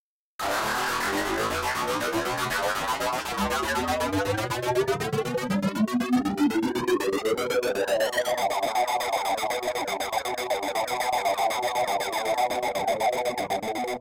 take off riff